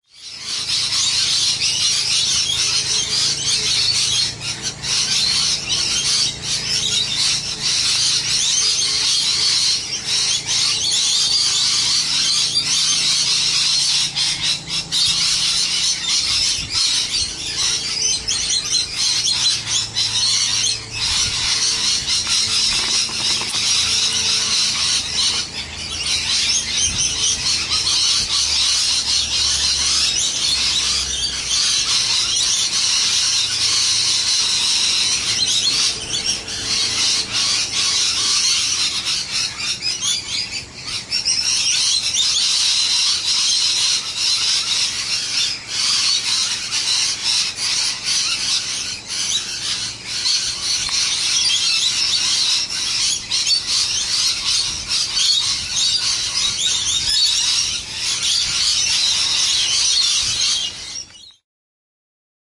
Sounds of summer. The Parrots raid the 'Umbrella" tree outside my house. Their cacophony is distracting and exciting. Someone, a few houses over, is mowing their lawn, a fortnightly necessity during the tropical wet, providing another iconic sound to the urban summer.